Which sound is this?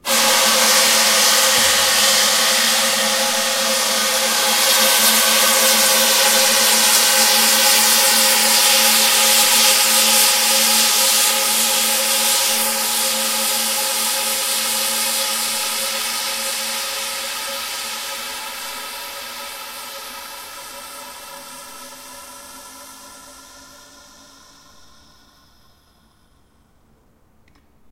One of a pack of sounds, recorded in an abandoned industrial complex.
Recorded with a Zoom H2.
percussion, clean, field-recording, city, high-quality, percussive, industrial, metallic, metal, urban